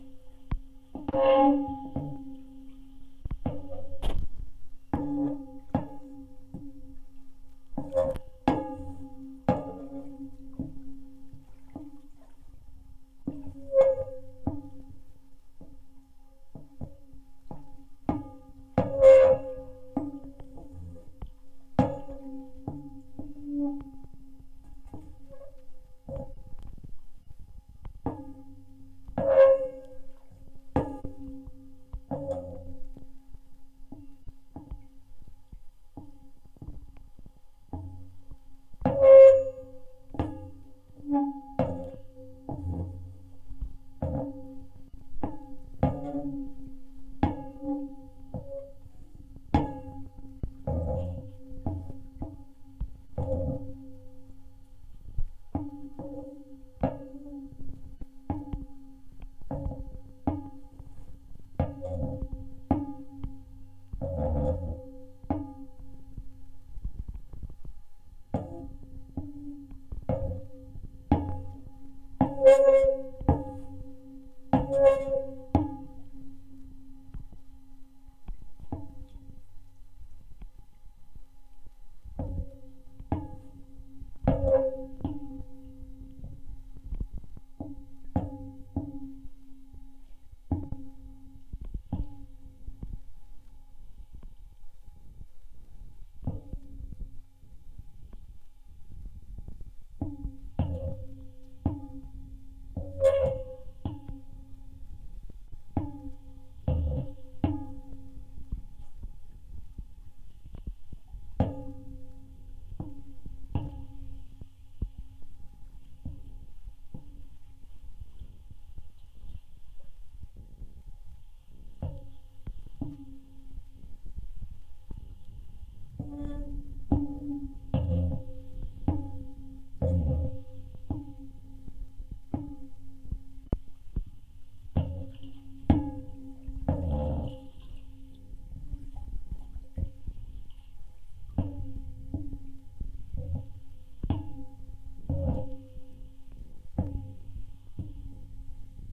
I attached a contact mic to a large metal pylon that holds a floating dock/walkway in place. This is the sound of these two things scraping together as the water moves them about. Recorded with a Cold Gold contact mic into a Zoom H4. Unfortunately there is some noise as the result of the contact mic moving slightly, not too bad though.